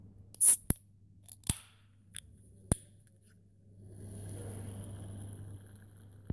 This sound is a recording of the opening of a can of soda, we can hear the sound of the metal lid and then the soda gas.